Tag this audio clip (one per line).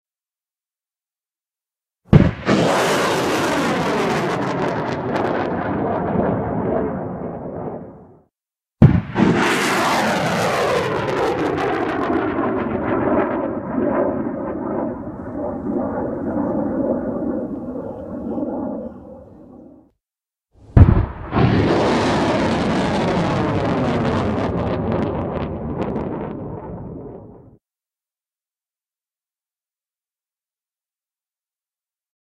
Combat
Gun
Rocket